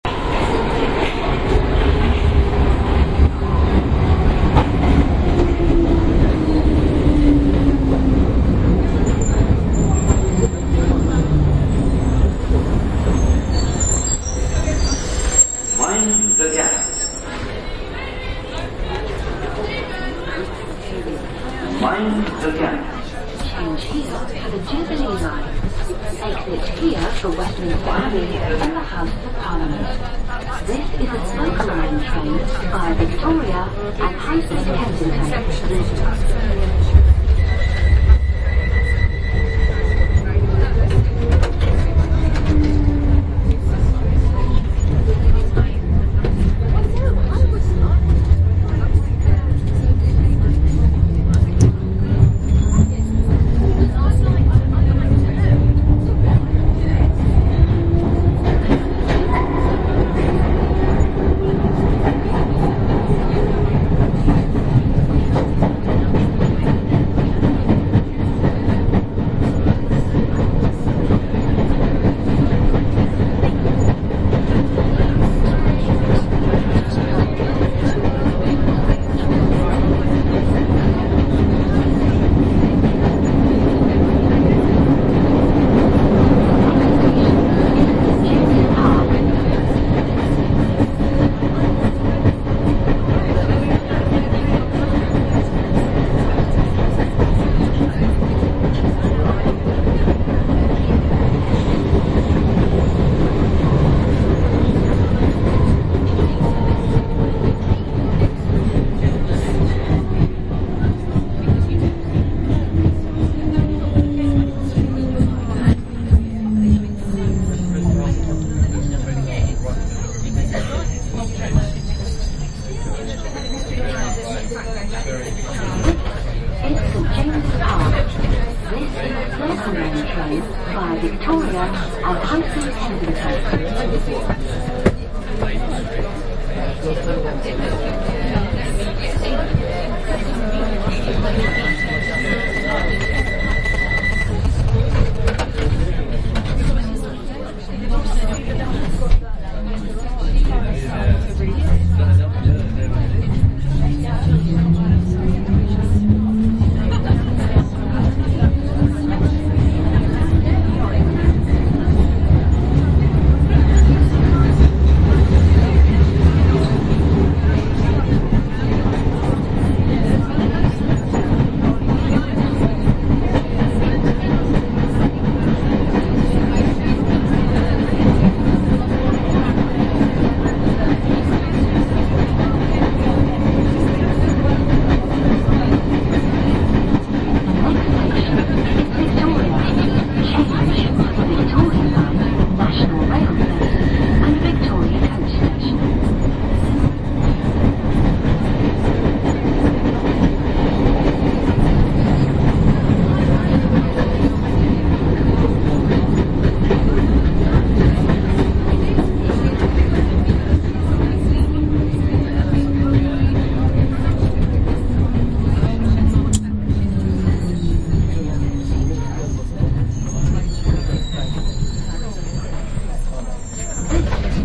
Circle Line Ambience
general-noise, background-sound, atmosphere, field-recording, london, ambiance, soundscape, city, ambience, ambient